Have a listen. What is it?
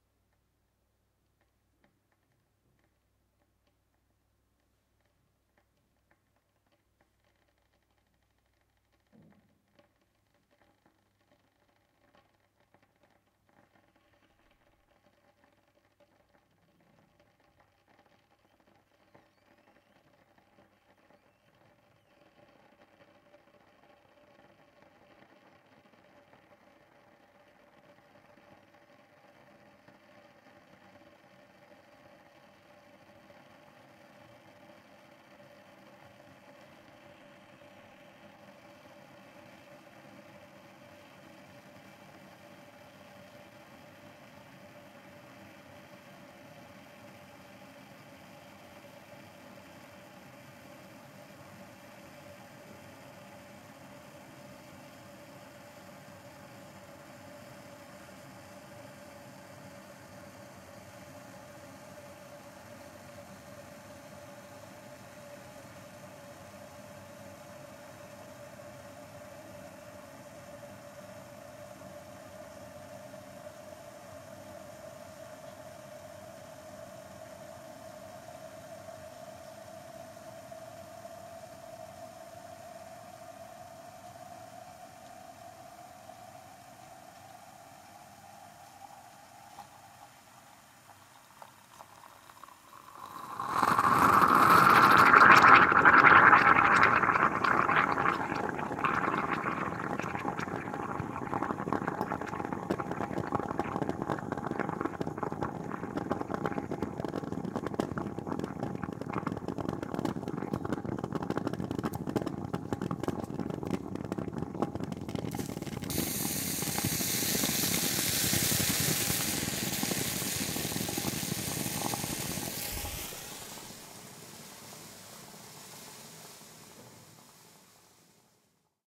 Coffeemaker making coffee and spilling it
A moka pot making coffee and spilling it
coffee, coffeemaker, coffee-pot, spill